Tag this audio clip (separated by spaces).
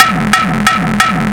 bass beat dirty distorted distortion drum extreme gabber hard hardcore hardstyle jumpstyle kick kick-drum noisy obscure single-hit xKicks